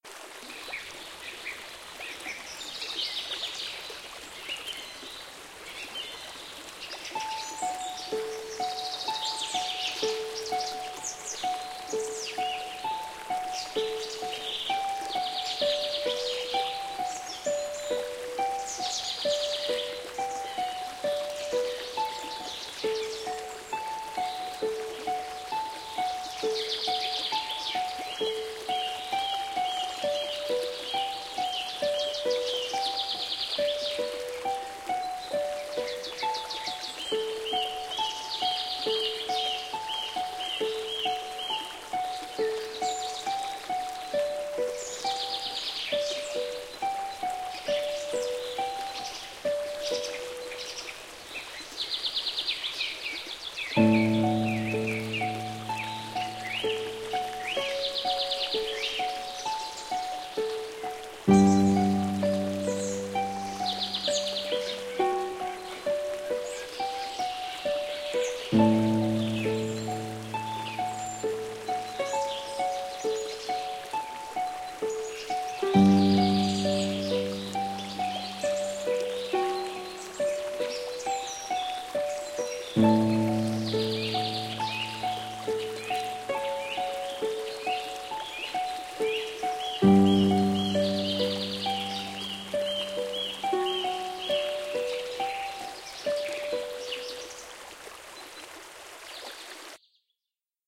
relaxation music #16
Relaxation Music for multiple purposes created by using a synthesizer and recorded with Magix studio.
I used sounds that aren't mine:
ambient, birds, creek, flow, flowing, harp, relaxation, relaxing, river, soft, stream, water